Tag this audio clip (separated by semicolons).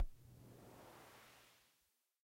crash; electro; harmonix; drum